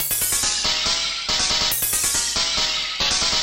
Big Wheel 140
electronic, experimental